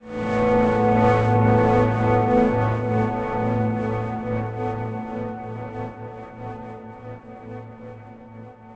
An acoustic guitar chord recorded through a set of guitar plugins for extra FUN!
This one is Asus2!